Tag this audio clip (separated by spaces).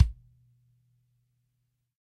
bass instrument bit 24 drum sample soft recorded erkan medium unprocessed snare hard tom floor studio dogantimur kick